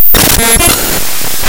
short clips of static, tones, and blips cropped down from raw binary data read as an audio stream. there's a little sequence marked as 'fanfare' that tends to pop up fairly often.